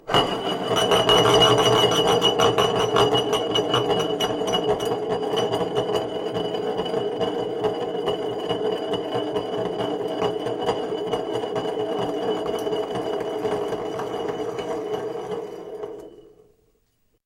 Glass - Spinning 01
A glass spinning until it stops - wooden surface - interior recording - Mono.
Recorded in 2001
Tascam DAT DA-P1 recorder + Senheiser MKH40 Microphone.